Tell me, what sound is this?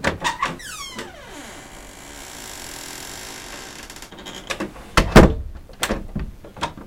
The sound of a squeaky bedroom door being opened and closed.